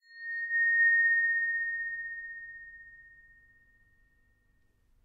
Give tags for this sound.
FEAR
RESONANCE
SURREAL

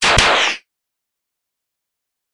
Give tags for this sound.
fire
handgun
noise

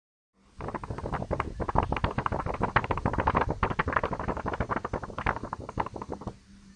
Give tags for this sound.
splosh
wibble
wobble-board

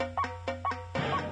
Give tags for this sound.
bending
bent
circuit
drumkit
glitch
yamaha

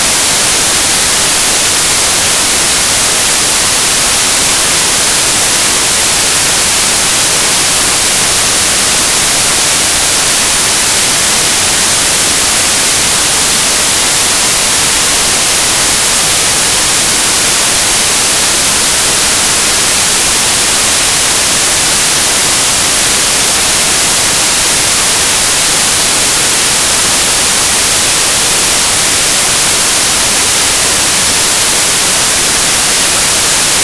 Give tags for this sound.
noise
audacity
white

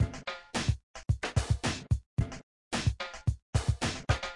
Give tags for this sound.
bass
bass-slap
beat
drums
funky
loop
mix
remix
so

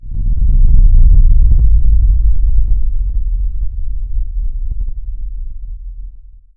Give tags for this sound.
earth-quake
quake
rumbling
trailer

